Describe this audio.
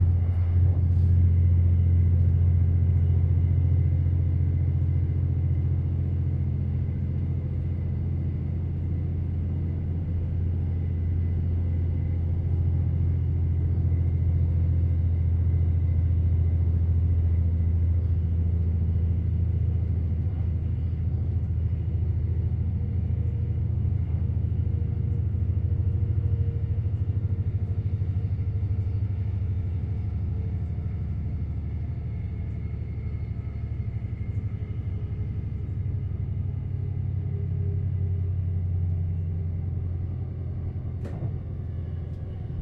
Inside diesel train cruise
Fieldrecording inside a dutch diesel train cruising.
Sounds of railway and diesel engine.
Diesel, Engine, field-recording, Railway, Train